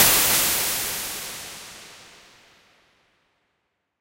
Lightning (thunderbolt)
The sound of lightning created with a synthesizer.
hiss, noise, smash, thunder, thunderbolt